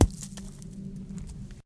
rock falls with ambience BG sound
rock falls on the ground with the background ambience sound
falling, hit, rock